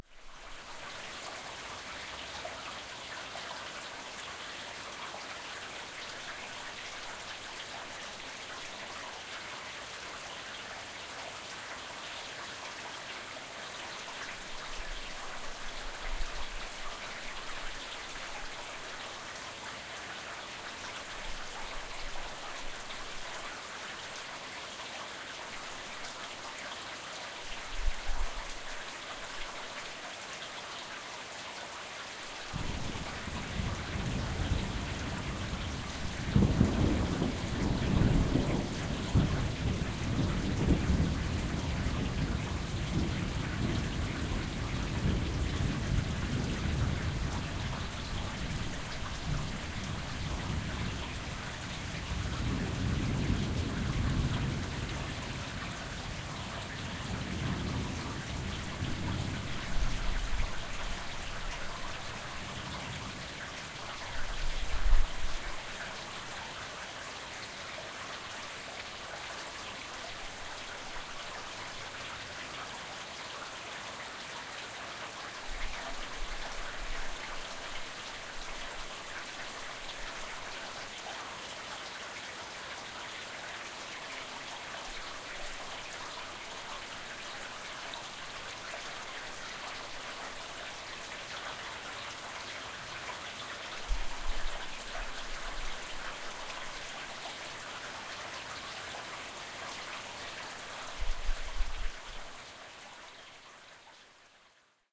light rain & thunder.
Recorded from the inside of house. Water dropping down